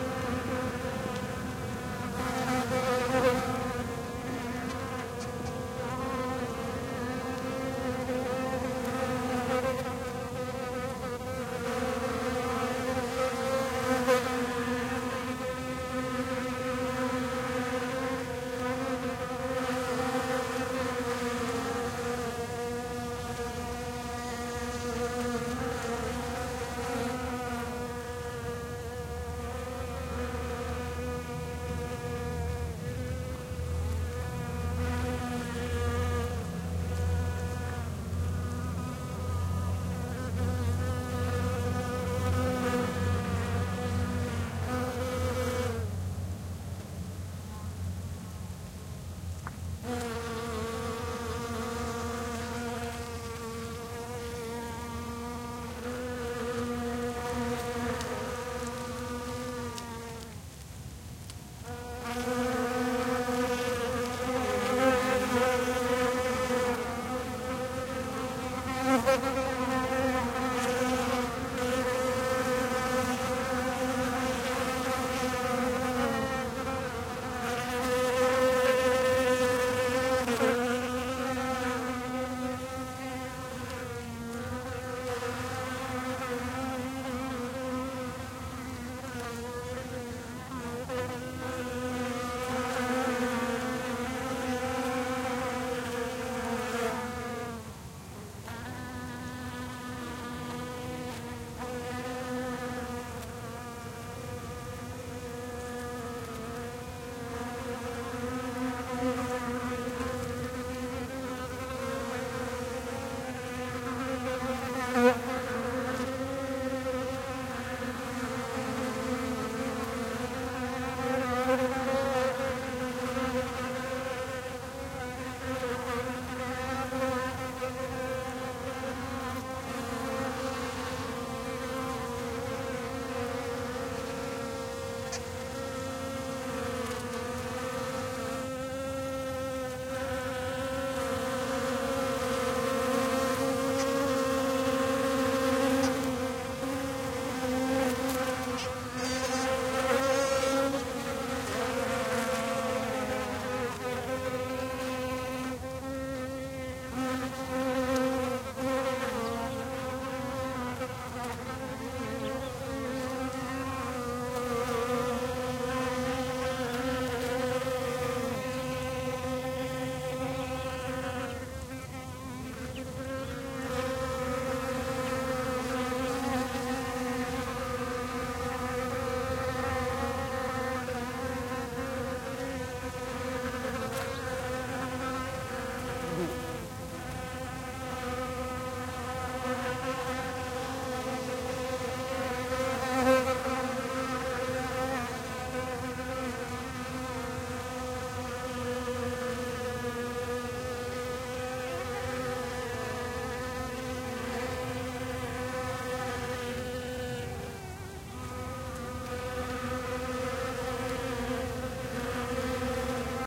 buzz of solitary bees foraging on a blooming Echium plant. Recorded near Puebla de Sanabria (Zamora, NW Spain) with two Primo EM172 capsules, FEL Microphone Amplifier BMA2, PCM-M10 recorder
bees, blossom, buzzing, flowering, Spain